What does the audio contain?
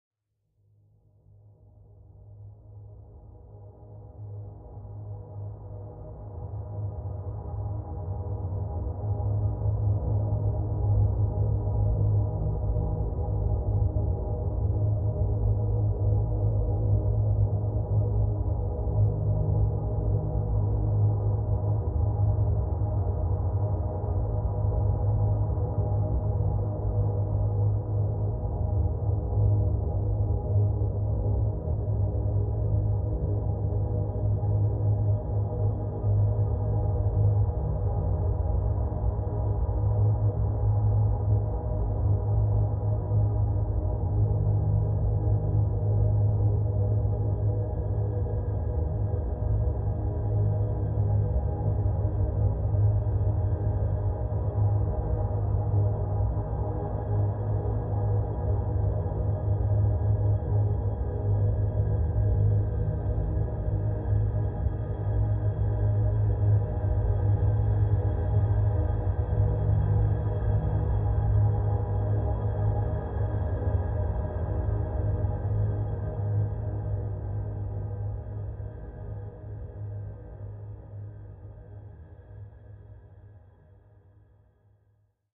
Sustained M7 chord, root note E3, 45BPM.
This is a small preview of an upcoming ambient sample pack I'm working on, which I'll be releasing to promote a short EP I've completed this year. The pack will come with lots of soft pads, synth one shots and soundscapes. As always, feedback is very welcome!